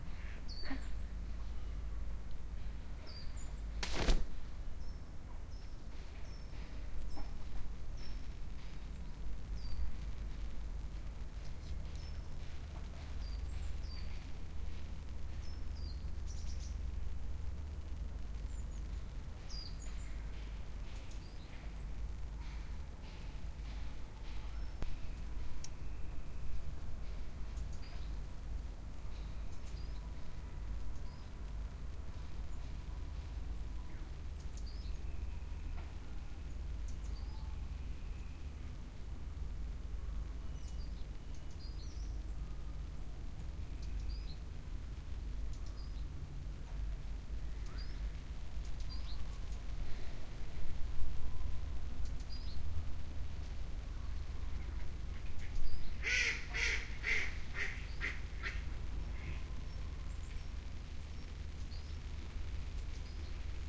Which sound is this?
Chapinhar Lago Patos
ducks, lake, natural-park, Parque-Serralves, ulp-cam, vegetation